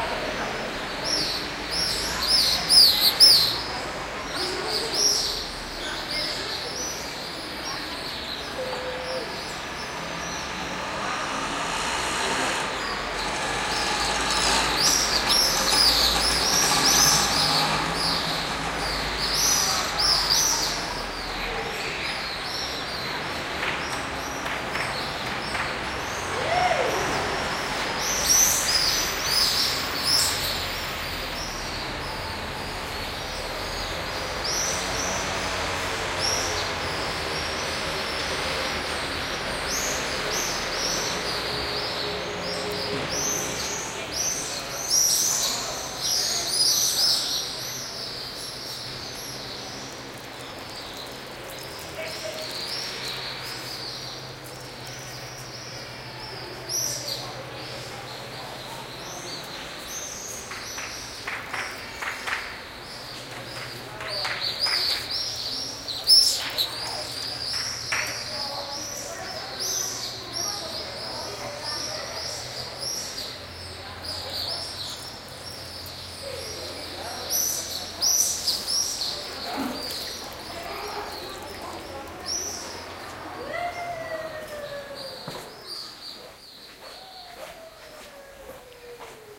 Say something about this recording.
20060413.swifts.traffic
swifts screeching on a background of streetnoise and traffic /chillidos de vencejos sobre un fondo de ruido de calle y trafico
traffic, ear-to-the-earth, swifts, field-recording, birds, city, streetnoise